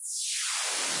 white noise filtersweep
part of drumkit, based on sine & noise
drums, noise